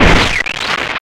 2329 Jovica STAB 004 mastered 16 bitREMIX
2329__Jovica__STAB_004_mastered_16_bit---REMIXEDI distorted it using two different distortion effect
electronic, lightning, powerelectronics